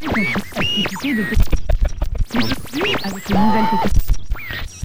Another chop from the radio bbox sample, this time highlihgting squeally sounds
radio, lo-fi, experimental